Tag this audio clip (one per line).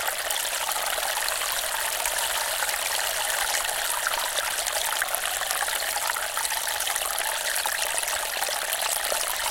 Water
Nature
Forest
Stream